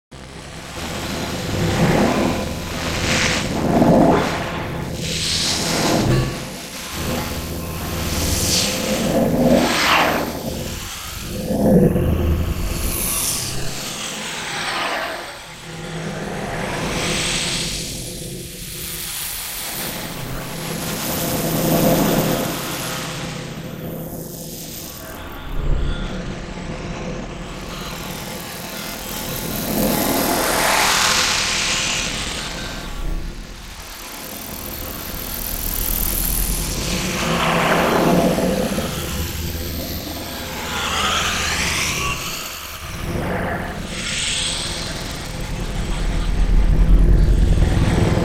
technogenic noise/hum (3/3) [synthesis]
a small, complex, dynamic drone created by automating the parameters of a free synthesizer for trance music (alpha plas t-forse)
is one of three samples
abstract, cyber-punk, drone, entropy, evil, freaky, fx, gore, horror, infernal, lava, mixed, overdose, plasma, rock-formation, rude, scattered, strange, stream, weird